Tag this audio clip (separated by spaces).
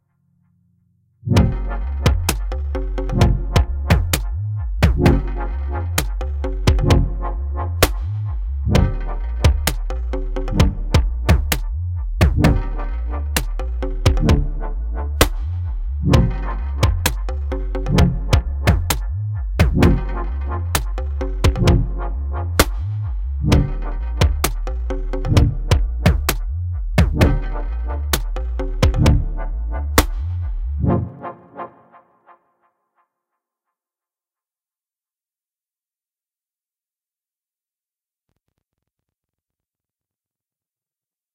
130bpm 2652 bass bassloop beat delay drum drumloop filter loop lowpass minimal percussion reverb subbass synthesizer techno wobble